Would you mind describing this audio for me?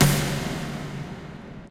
SNARE REVERB
A Snare with reverb
drum, drums, hit, percussion, sample, snare